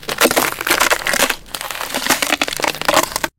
Ice 7
Derived From a Wildtrack whilst recording some ambiences
BREAK, cold, crack, effect, field-recording, foot, footstep, freeze, frost, frozen, ice, snow, sound, step, walk, winter